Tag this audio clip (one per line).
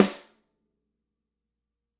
instrument
multi
dry
snare
drum
real
stereo
velocity
acoustic